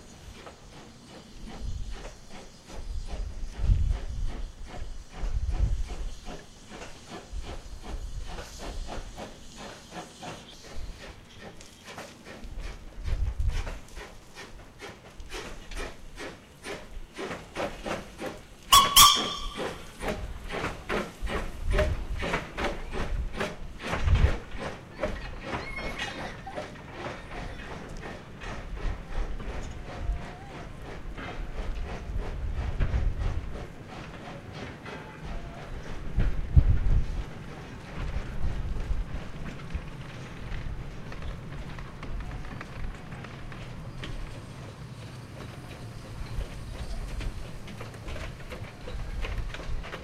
CHATHAM DOCKYARD STEAM TRAIN
Steam Train at Chatham Dockyards
chatham, dockyards, steam, train